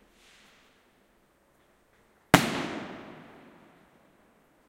single firecracker / un cohete